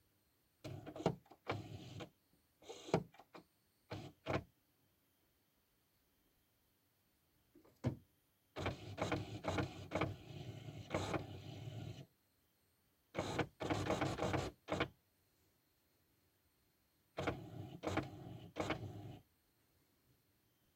printer turning on
hp, paper, print, printer